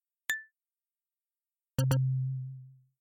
Correct and Incorrect Chime
Singular correct, and incorrect tones.
bell, chime, chimes, correct, ding, incorrect, quiz, tone